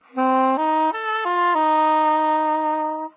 Non-sense sax played like a toy. Recorded mono with dynamic mic over the right hand.
loop, melody, sax, saxophone, soprano, soprano-sax, soprano-saxophone